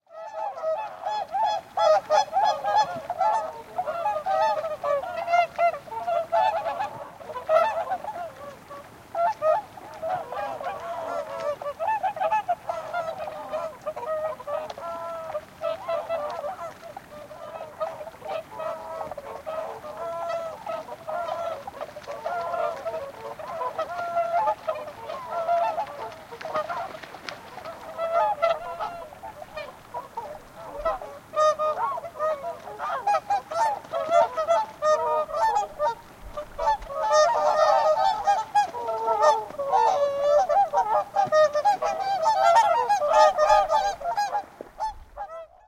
Group of Whooper Swans (Cygnus cygnus) calling on the field with some water splashing.
Recorded with Tascam DR-100 internal mics in Finland. Morning in october 2012.
swan, birds, whooper-swan